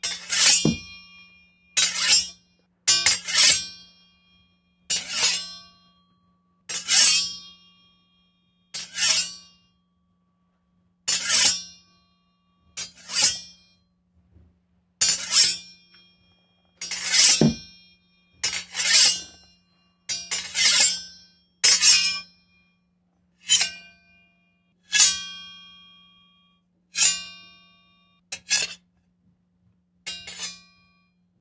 Sound of drawing sword